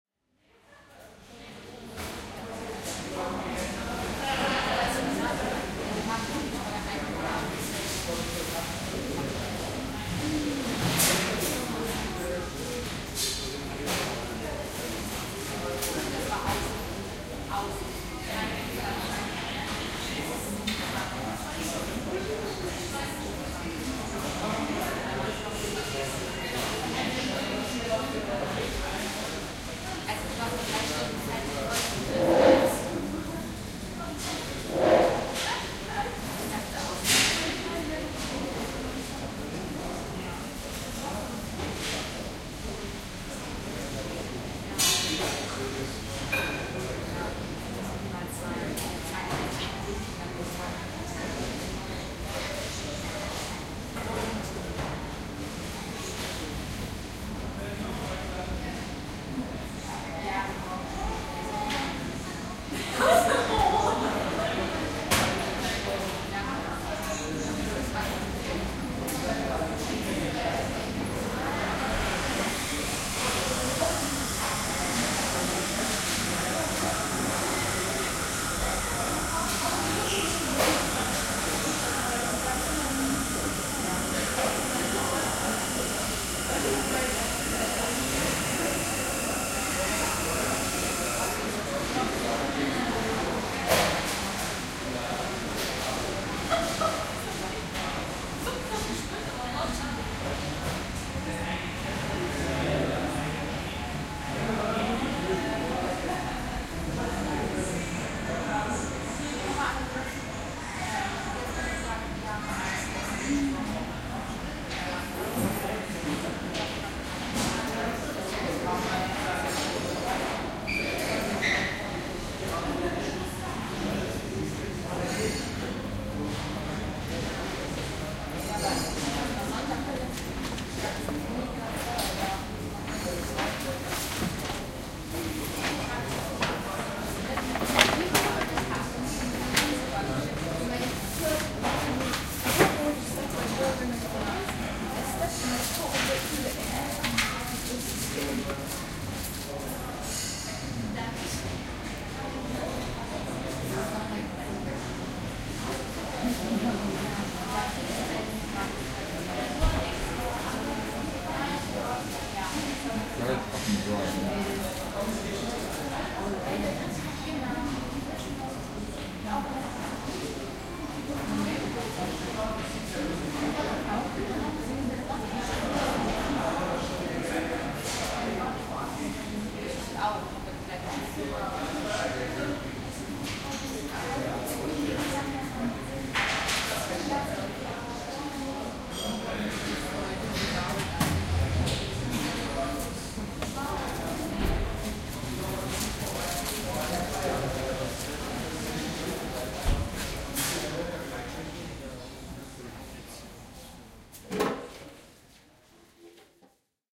lunchtime cafeteria Kantine mittags
stereo ambiance of a medium sized cafeteria during lunchtime, spoken language is German
Atmo, lunch, ambiance, field-recording, German